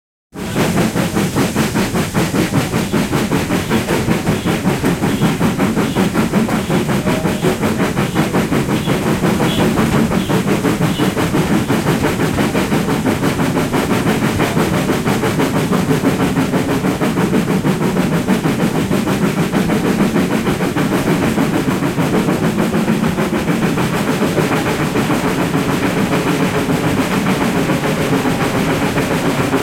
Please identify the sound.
Steam engine speeding up
Steam train speeding up
steam-engine, steam-train, train